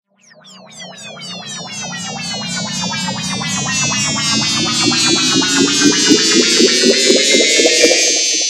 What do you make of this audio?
Stab fx for hardstyle, house
Created with audacity
house, electro, hit, stab, fx, hardstyle